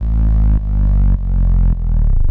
modified new-hoover for the future.
made in Ableton Live 8 Suite: Sampler
bass, chorus, drop, octave-modulation, thick